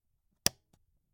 Amiga 500 power supply unit turn off
The button of the Amiga 500 power supply unit is turned off.
Recorded with the Fostex FR-2LE and the Rode NTG3.
amiga, button, unit, power, 500, supply, off, turn